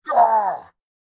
Dialogue, Pained Yelp, Loud, C
Some pained vocal exclamations that I recorded for a university project. My own voice, pitched down 20%. These are the original stereo files, though I suggest converting them to mono for easier use in your projects.
An example of how you might credit is by putting this in the description/credits:
The sound was recorded using a "H6 (XY Capsule) Zoom recorder" on 15th December 2017.
agony, dialogue, hurt, male, pain, pained, scream, voice, yelp